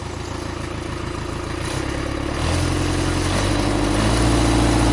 Massey Engine Slow Rev Up
Buzz, electric, engine, Factory, high, Industrial, low, machine, Machinery, Mechanical, medium, motor, Rev